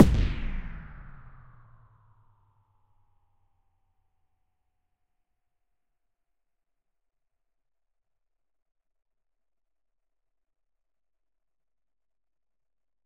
ss-wavedown
A low end pitched down techno bass drum
bass, percussion, electronic